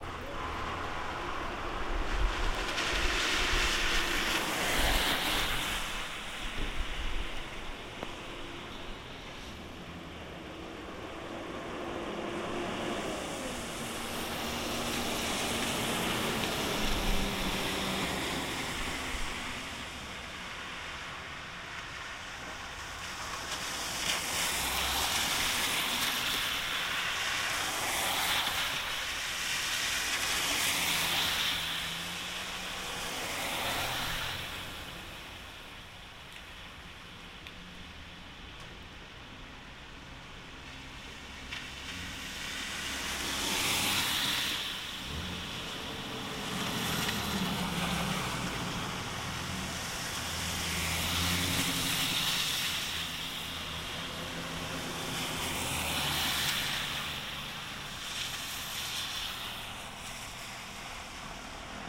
Traffic on wet roads
Recorded via mini disc during a light rain